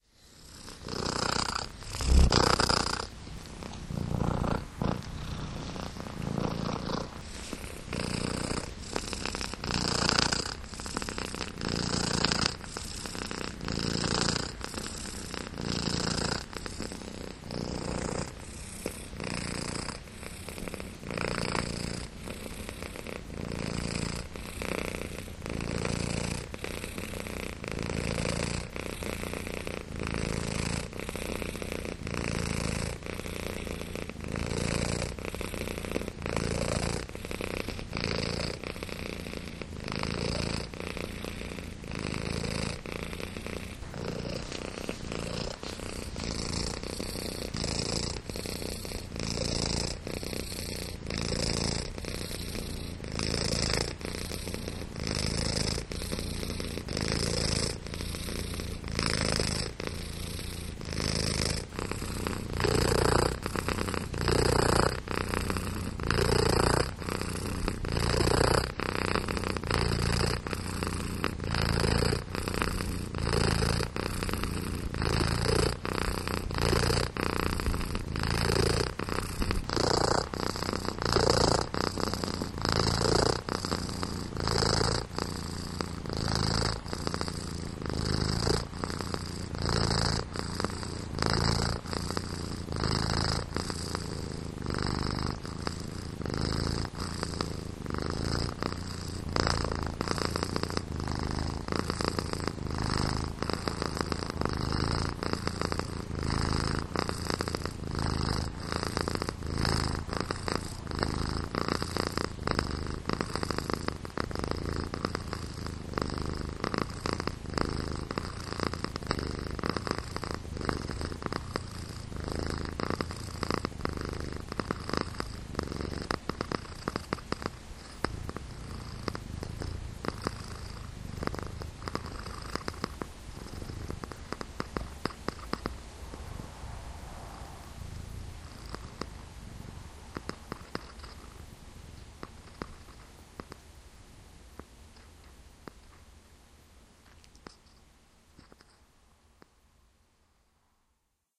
cat.loud.purring
Cat purring loud until she falls asleep. Recorded with Olympus DS40
kitteh, purr, kitty, purring, loud, cat